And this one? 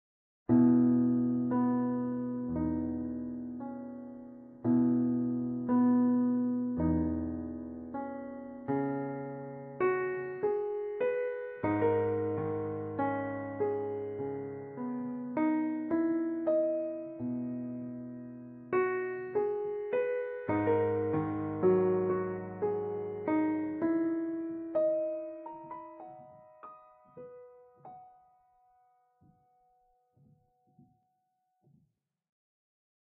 Peaceful Simple Piano
A short simple piano melody.
acoustic, cinematic, grand, improvisation, melancholic, melody, minimalistic, music, outro, piano, sad, short, solo, tune